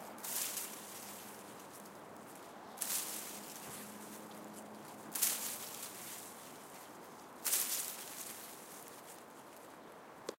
Dry Grass Rustle
Rustle created by gently moving my hands through dry wheatgrass in late June. Recorded with a zoom h5.